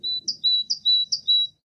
A fairly isolated recording of a great tit.
bird, birdsong, great-tit